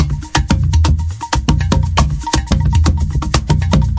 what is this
Percussion-loop,2 bars, 120bpm.(Groove B)
Instruments: Schlagwerk U80 Neck-Udu; Meinl TOPCAJ2WN Slap-Top Cajon; Meinl SH5R Studio Shaker, 16" Floortom with Korino Drumheads.